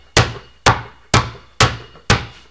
santos balon 2.5Seg 4
bounce, ball, Bouncing